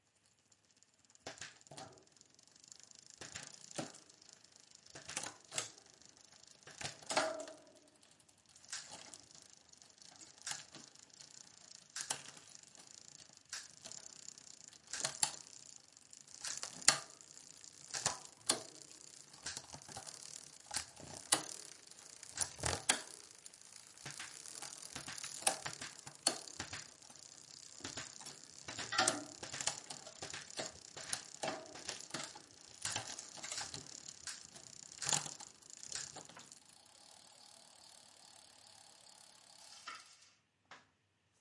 Road Bike, Rear Derailleur, Rear Mech, Shift, Click
The sound of a road bike rear mech / derailleur being shifted whilst clasped off the ground